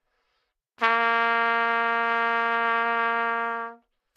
Trumpet - Asharp3
Part of the Good-sounds dataset of monophonic instrumental sounds.
instrument::trumpet
note::Asharp
octave::3
midi note::46
good-sounds-id::2827